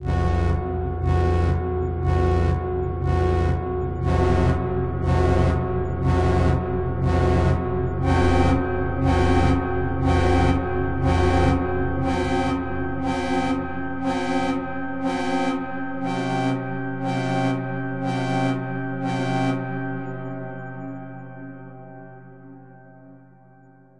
slow alarm 94 5c5-5b
Slow alarm increasing step by step and then decreases in a large hall. For example to accompany the opening or closing of a huge gate. This sound is not recorded but created with several synthesizers.
60bpm, alarm, alarming, close, clumsy, dark, deep, gate, hall, mechanical, nervous, open, pulsating, reverb, slow